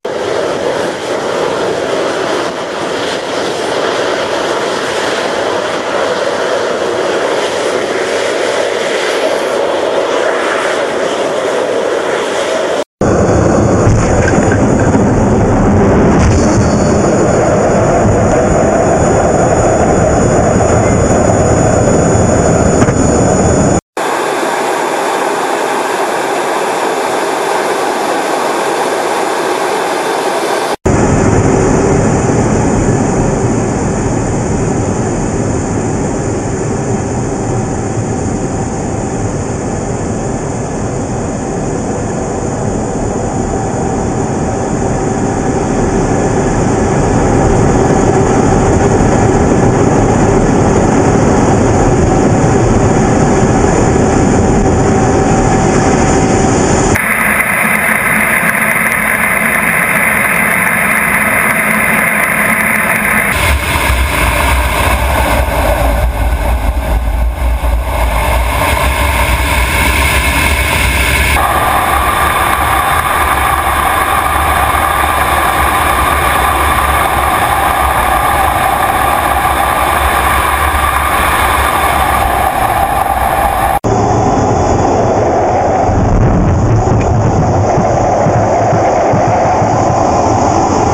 Jet Fighter Views (Cockpit, Outside) During Liftoff, Flight and Landing
Original video description: DEPLOYMENT (Jan-July 2011) A look into sorties flown in an F/A-18F Super Hornet assigned to the Checkmates of Strike Fighter Squadron (VFA) 211 during a deployment aboard the aircraft carrier USS Enterprise (CVN 65). The aircraft carrier and Carrier Air Wing (CVW) 1 were on a deployment conducting maritime security operations in the U.S. 5th and 6th Fleet areas of responsibility. (U.S. Navy video by Lt. Ian Schmidt/Released).
jetpack, flight, looping, cockpit, supersonic, boost, speed, airplane, transonic, loops, sound-barrier, bomber, loop, pilot, jet, plane, air, fighter, transsonic